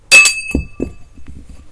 cling-bounce
Dropped and threw some 3.5" hard disk platters in various ways.
Cling and bouncing noise
drop, cling, bounce